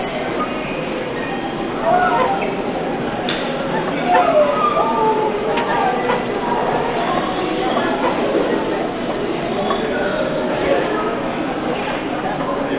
creaking subway escalator, Nokia N800 tablet built-in mic